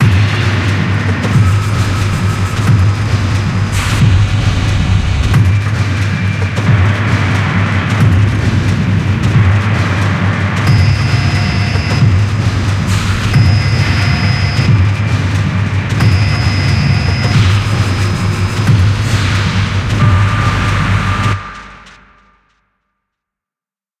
A mechanical rhythm layered with hits of machine noise from Kronos a chemical factory producing pigments.